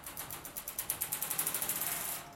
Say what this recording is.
Keys on Fence Skatepark.2
alive, live, One, recording